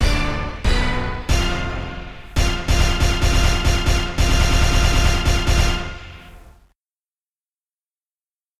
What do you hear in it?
Shock (Funny Version)
Dramatic Orchestra Hit but with added notes at the end for comedic effect.
video-game, strange, shocked, effects, orchestra, cartoon, comedy, scared, sfx, animation, drama, surprised, film